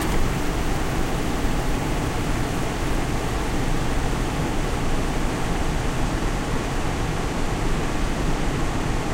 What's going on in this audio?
ac fan w switch-off compressor
My window air-conditioner's fan keep srunning while the compressor switches off. Please use in conjunction with the other samples in this pack. Recorded on Yeti USB microphone on the stereo setting. Microphone was placed about 6 inches from the unit, right below the top vents where the air comes out. Some very low frequency rumble was attenuated slightly.
compressor, Close-up, Fan, Stereo, Power-off, Air-conditioner